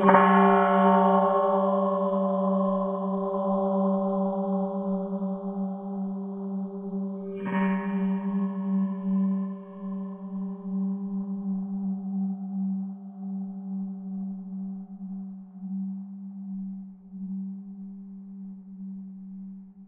hanging-bells-frag-04
Small hanging bells recorded and then slowed down using audio editor. Sounds like a much bigger bell. Recorded on Zoom H6.